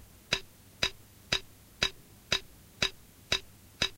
a wonderful day clicks
I can't remember what the bpm is, but here is a click track.
click, track